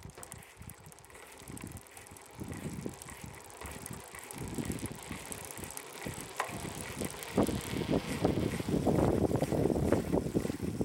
Bike On Concrete OS
Mountain-Bike Pedalling Concrete